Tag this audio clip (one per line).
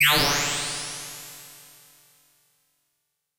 machine
laser